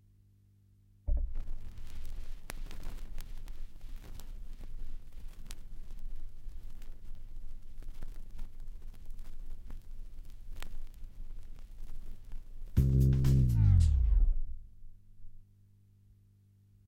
Vinyl crackle and hum.
crackle, hum, record, vinyl